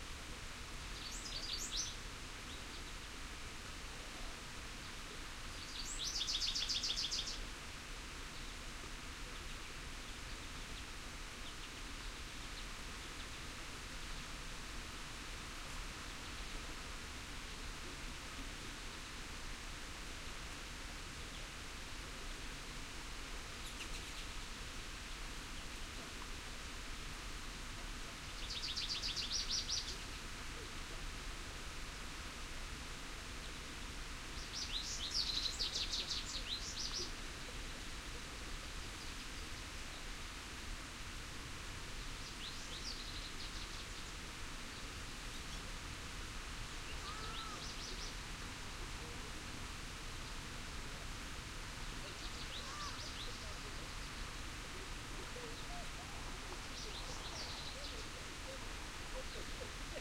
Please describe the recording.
20080805.birches.n.birds.00
murmur of wind on trees (birches), birds sing in background. Shure WL183, Fel preamp, Edirol R09 recorder. Afternoon, Forestville port, Quebec
summer, wind, birds, beach, trees, field-recording, nature